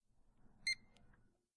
barcode-reader
The typical sound of a barcode scanner.
scanner, campus-upf, reader, UPF-CS13, barcode